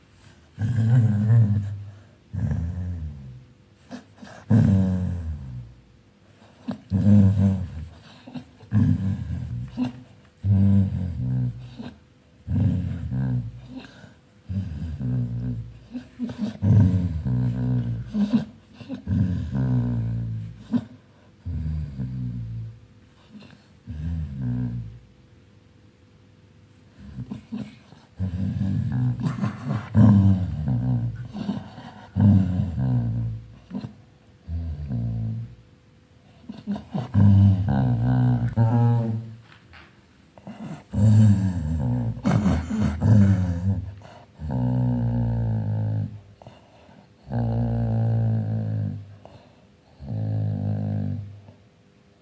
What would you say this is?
Angry dog playing.
How it was created: I caressed my dog, he reacts like this. Recorded by me on a cell phone Samsung J5
Software used: Audacity to cut and export it
Animal, Dog